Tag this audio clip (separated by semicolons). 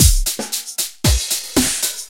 115; 115bpm; beat; bpm; drumloop; loop; Maschine